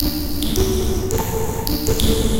Nursery Sink 0bject count1
Awe, a nursery in a glass of water HIT LOOP!
effects, electric, industrial, noise